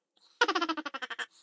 A funny laugh made by me speeding up a recording of me laughing in Audacity. Appropriate for chipmunks, squirrels and other small woodland-type critters.